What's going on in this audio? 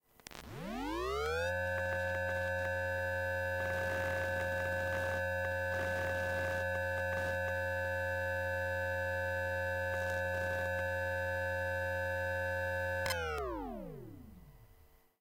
PGJ TelPickupCoil Recording Raw.07 Exterior Hard Drive
In the spirit of the jam, as a thank you for the chance to be a part of such an awesome event and to meet new incredibly talented people, I decided to give away for free some samples of recording I did of electric current and some final SFX that were used in the game. I hope you find these useful!
This is raw sample of an exterior hard drive switching on and off I recorded with a telephone pickup coil, during the Prometheus Game Jam of 2017 in the town of Lavrio. This was heavily processed for our game "Breach" for various SFX.
drive, electric-current, electricity, hard, hum, noise, shut-down, start-up, telephone-pickup-coil